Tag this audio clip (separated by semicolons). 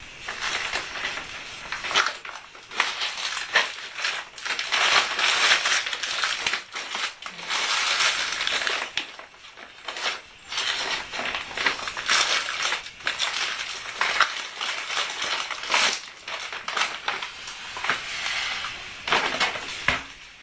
domestic-sounds; christmas; rustle; field-recording